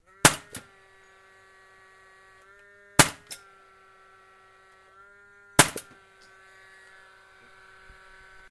industrial nailgun 3shots slow
nail gun shooting 3 slow shots into open space. motor idles after each shot
click, gun, motor, nail, shooting, shot